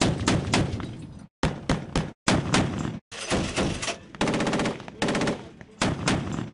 Firing 25mm from LAVS during training
Light armored vehicle training.
vehicle, light, battle, combat, boom, bang, burst